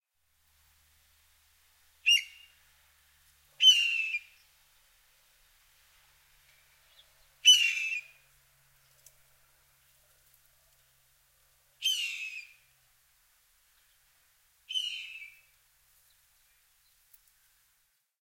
211123 Red-tailed Hawk call, pretty close, noisy roof, Toronto 10am
Red-tailed Hawk call from high in tree (might be Blue Jay mimicking), noisy urban, Toronto. Roof mounted CS-10EM mics.